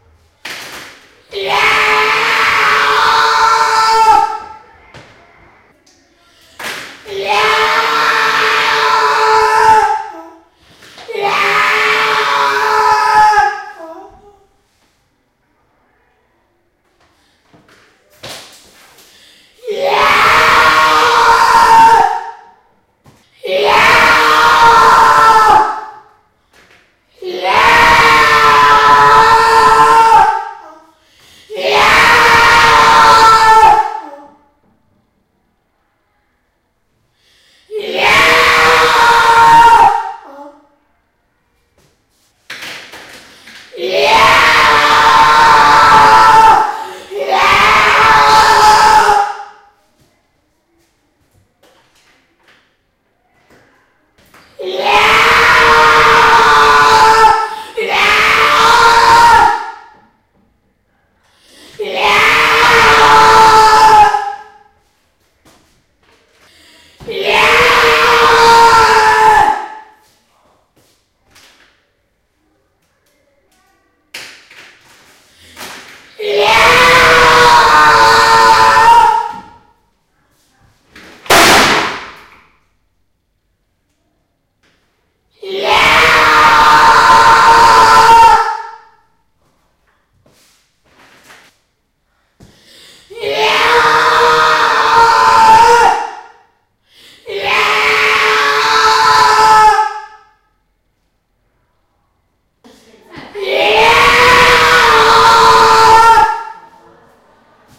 Recorded audio in a small room
sinister, thrill, monster, haunted, scream, terror, scary